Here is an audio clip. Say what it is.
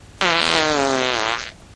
an awesome fart
I almost didn't get the recorder on in time before this forceful vapor escaped!